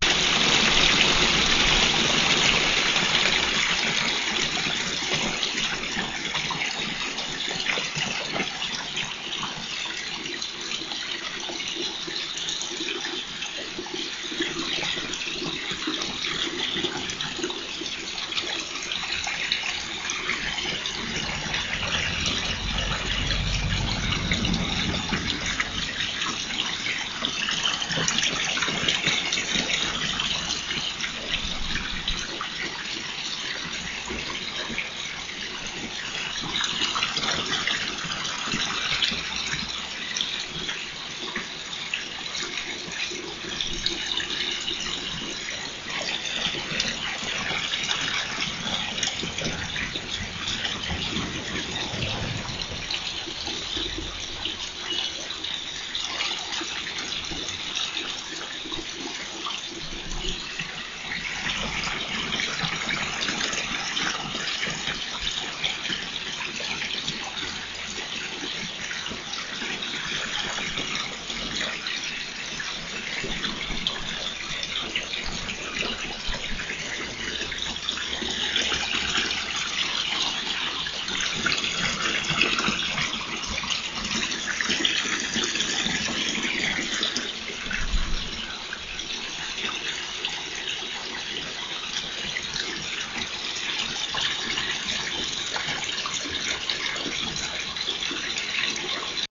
Field recordings of water source from south Spain. Galaroza, Huelva